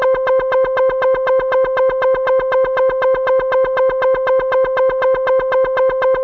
Digital alarm 03
Digital, alarm, beep, tone